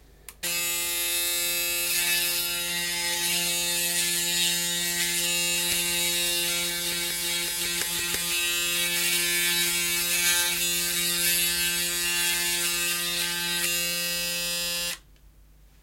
Person shaving face with electric razor, turn on, hum, buzz, turn off